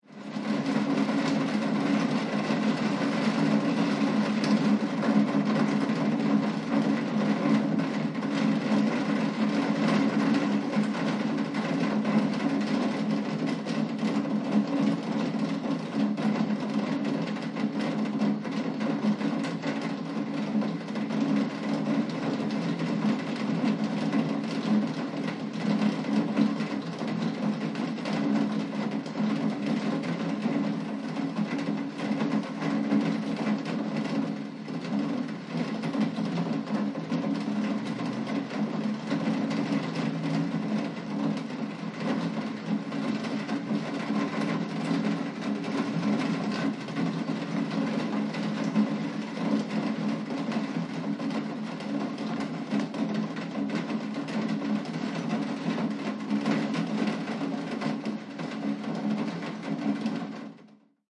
Raindrops on window sill 7 (close)
ambience, drip, dripping, droplets, drops, nature, rain, raindrops, raining, water, weather, wet, window, windowsill